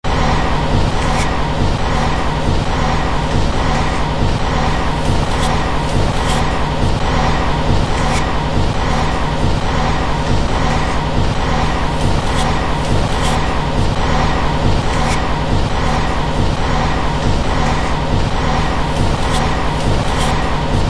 Wind Rhythm
Created by reversing and chopping wind noise.
wind, chimes